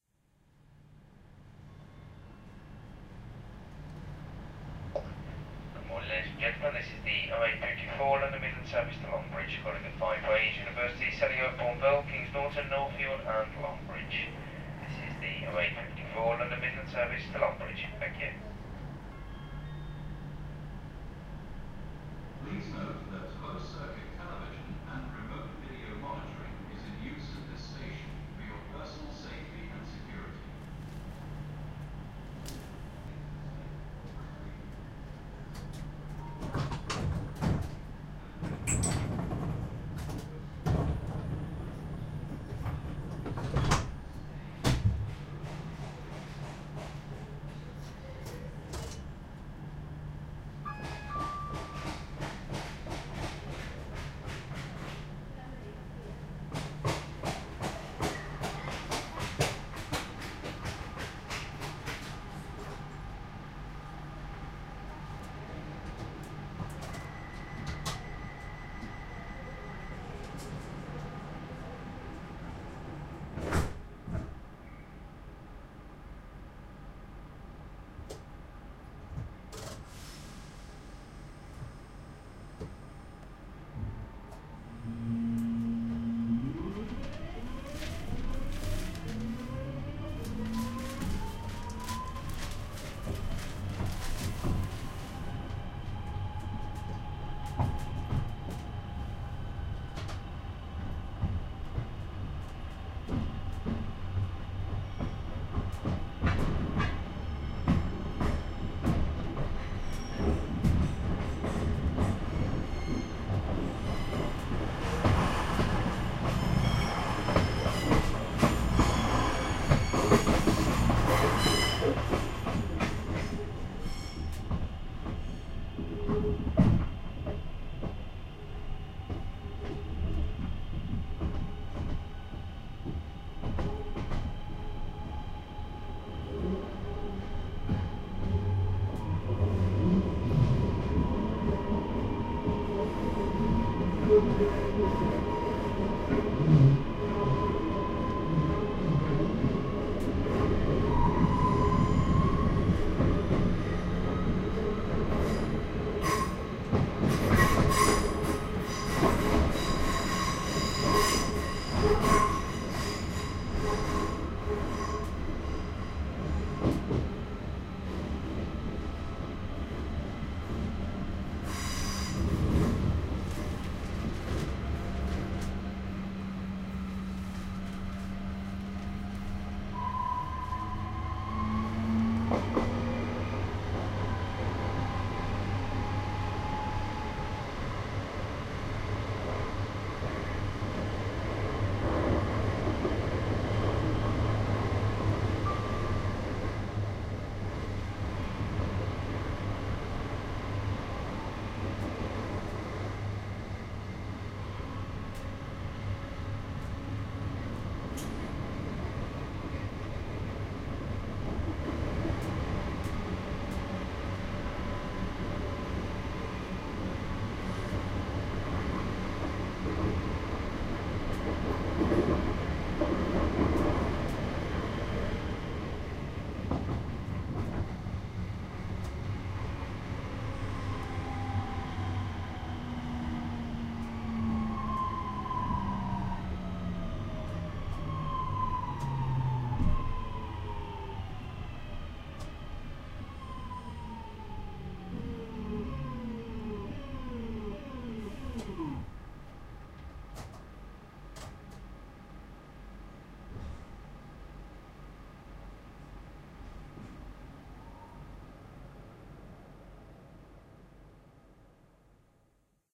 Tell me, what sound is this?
train-ride-edited-one-station
Birmingham New Street to Five Ways station on the London Midland commuter train.
H2 Zoom front mic on seat pointing up to catch ambient sound, wind shield.
field-recordings, street-sounds, uk